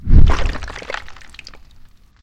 Splatter - body falling apart
Created from various sounds in audacity
Blood, Splatter